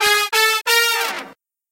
Horn Stabs Entrance
A badass horn-stab entrance.
Created using this sound: